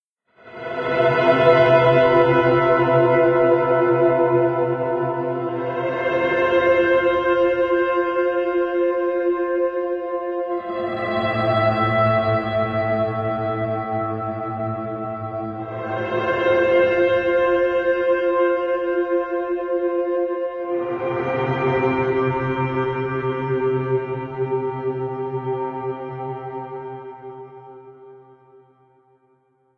Mellow sound loop that might be useful to somebody someday. Created with Musescore. Modified with Audacity.